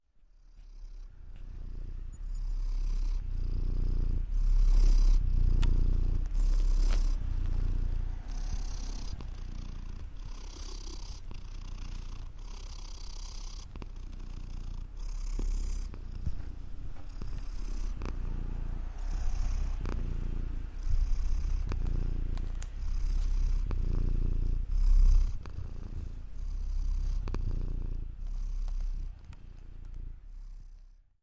purring cat 02
Happy cat :)
Recorded with Zoom H1n
kitten, animal, purr, purring